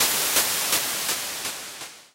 Water pounding
Can sound like a vertically-moving hose-run sprinkler.
Created using SFXR.